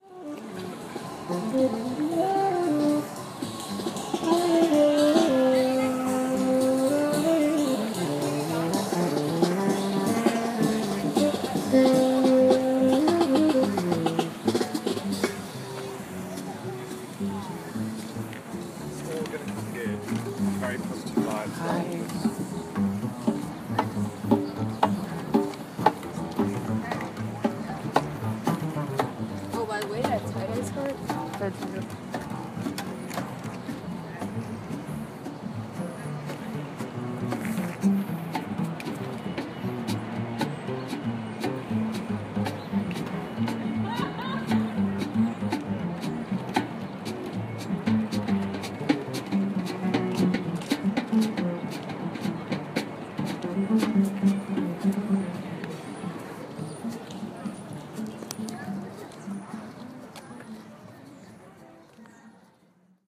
Central Park Jazz
A small jazz band playing with bird song and people chatting
ambience, band, birds, central, city, field-recording, jazz, live, new, nyc, park, people, street, through, walking, york